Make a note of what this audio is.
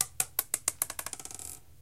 basically, this is the recording of a little stone falling on the floor,faster or slower, depending on the recording.
rock, stone